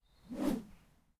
Raw audio of me swinging bamboo close to the recorder. I originally recorded these for use in a video game. The 'C' swings are much slower.
An example of how you might credit is by putting this in the description/credits:
The sound was recorded using a "H1 Zoom recorder" on 18th February 2017.